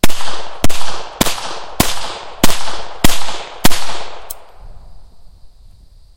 Bryco Arms Model 38 - 7 shots alt
A TASCAM Dr-07 MkII stereo recording of the Bryco Arms Model 38, .380 ACP.
Recorded outside in a woodland environment. Here's a video if you like to see.
gun, 380, 380acp, handgun, fire, shot, model, gun-shot, shell, pistol, shoot, firing, outdoor, bryco, firearm, arms, 38